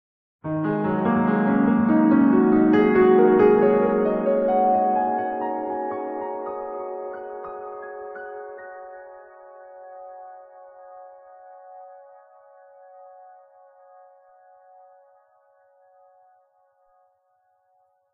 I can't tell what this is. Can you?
[11] s-piano penta up 1
Piano piece I played on my Casio synth. This is a barely adjusted recording with a record-tapeish chorus already added in the synth.
upwards, tape, pentatonic, piano, notes